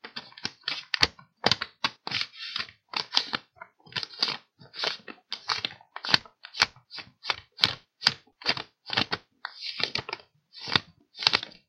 Some paper sounds.